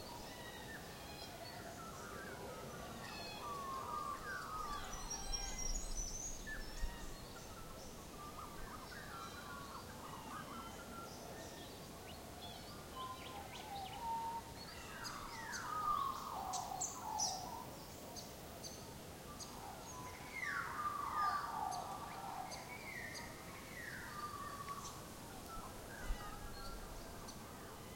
Morning chorus at Yalgorup National Park, Western Australia.
ambiance, australian-bush, birds, dawn, field-recording, magpie, morning-chorus, nature